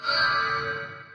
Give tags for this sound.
ethereal jingle sparkle magic Button bell ui Fantasy sparkly fairy crystal chime spell chimes airy tinkle

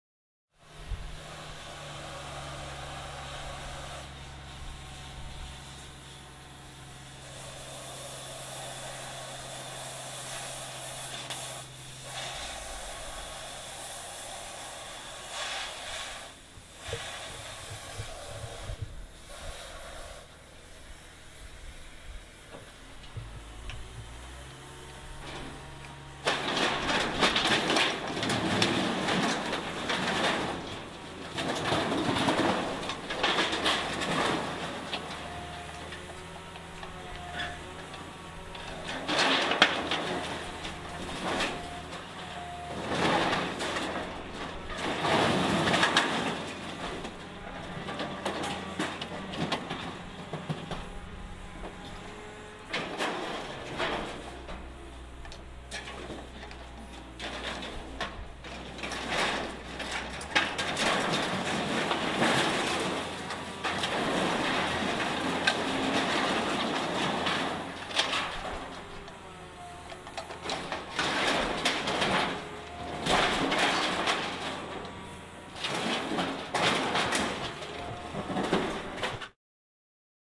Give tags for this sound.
cut cutting industrial metal

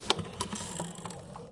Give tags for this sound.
machine one-shot ui

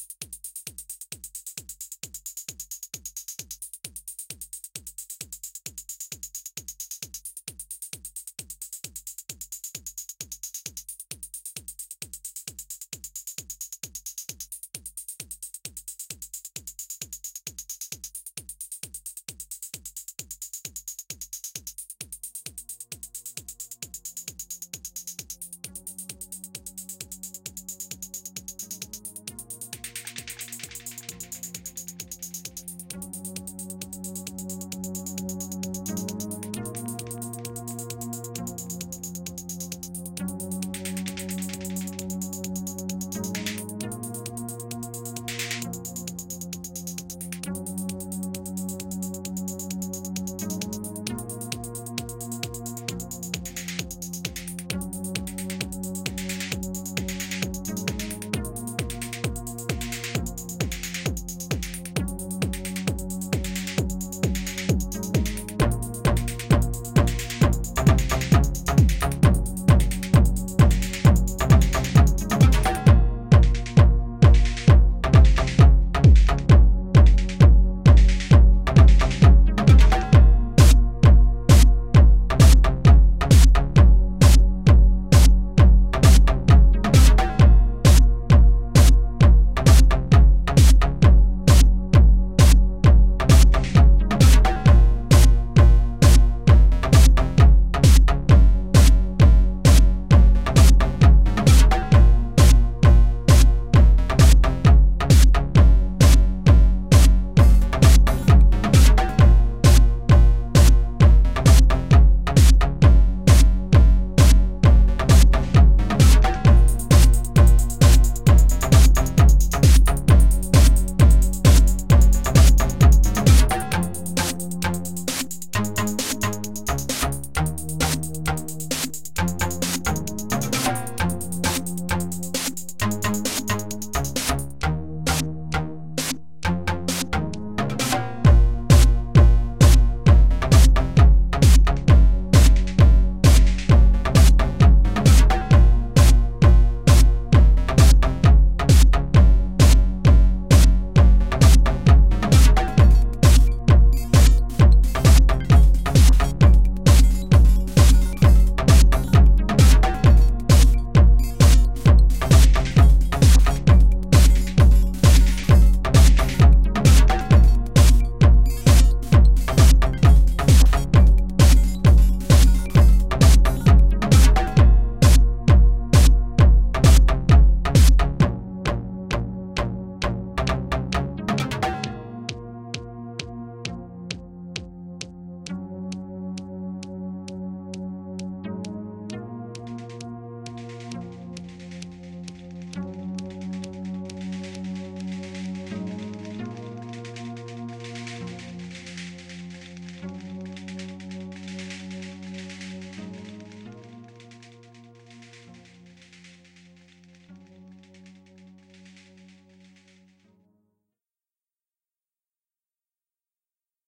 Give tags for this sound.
bass beat dance digital drum electronic fm loop synth synthesizer techno

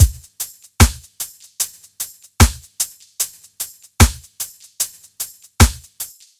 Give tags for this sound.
DuB rasta roots HiM Jungle onedrop reggae

